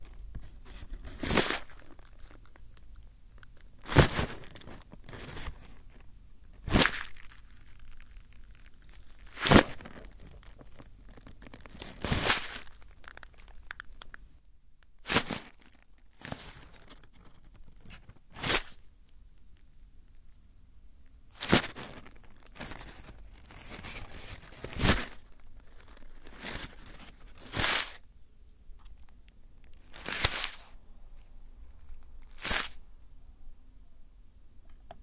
Percussive scrunching of paper. Stereo Tascam DR-05